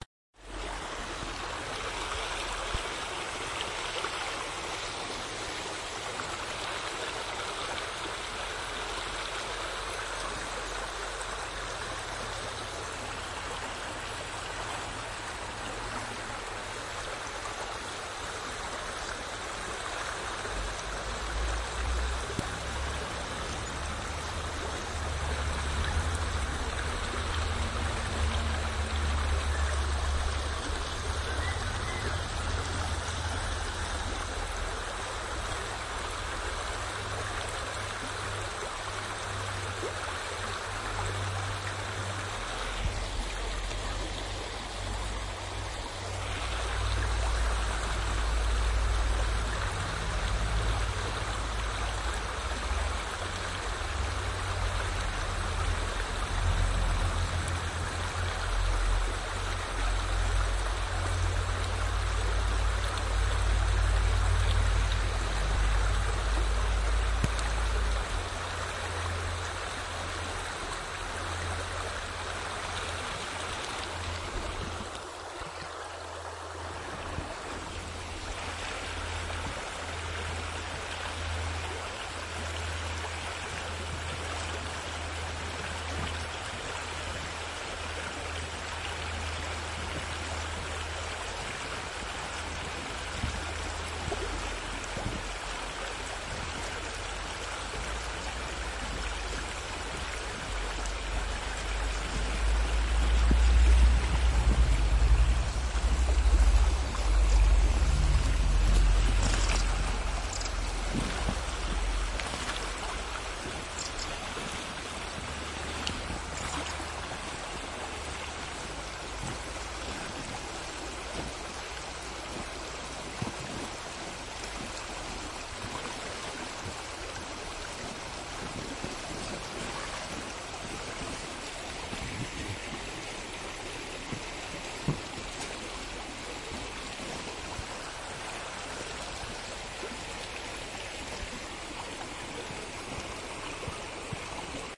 Recording of Zakopianka River in Poland.